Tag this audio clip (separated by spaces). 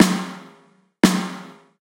compression eq-ing restoration Snare